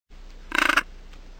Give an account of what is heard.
duck attacked
me quacking like an attacked duck. edited for all your goodness